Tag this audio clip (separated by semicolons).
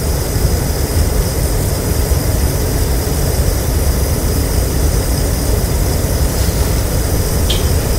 gas; stove; clicking